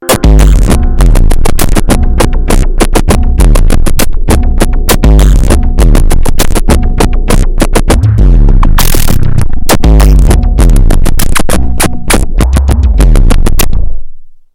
Micron Sounds Pack
Acid Like Bass
Random Synthy Sounds . .and Chords
and Some Rhythms made on the Micron.
I'm Sorry. theres no better describtion. Im tired